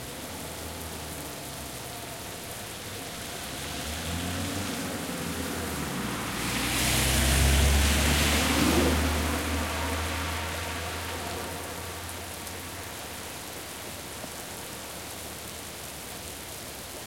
doppler coche lluvia 3
doppler, rain, car